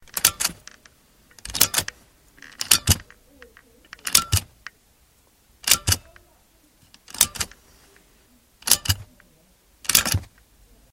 Seatbelt, Out, A
Raw audio of a seatbelt in a car being released numerous times.
An example of how you might credit is by putting this in the description/credits: